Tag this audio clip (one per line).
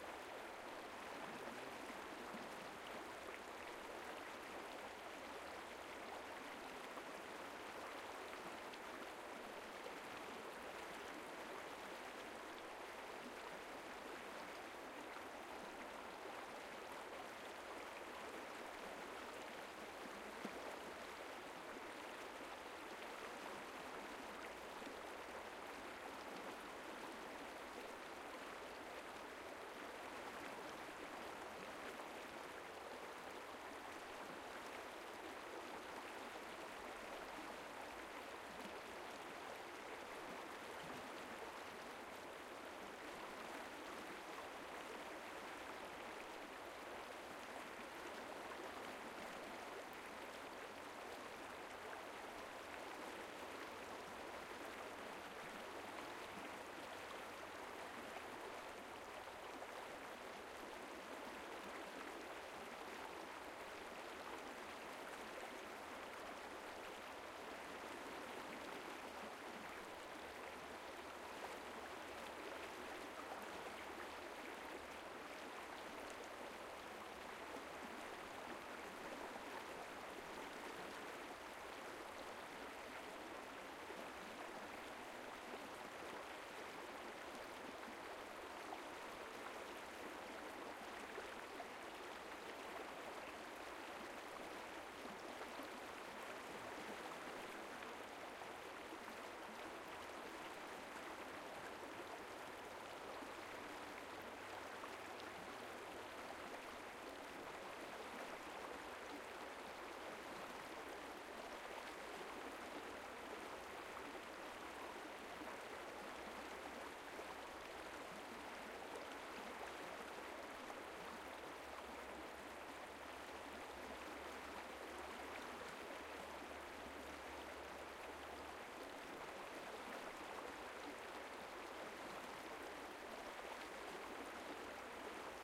babble
babbling
brook
burble
creek
eddying
flow
flowing
gurgle
liquid
relaxing
river
sloshy
stream
water
wet